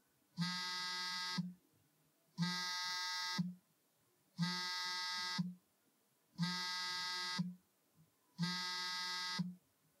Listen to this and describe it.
Cell phone vibrate, in hand with loose grip
iPhone 6, vibrating in a loose grip
cell, hand, iPhone, mobile, phone, vibrate, vibrating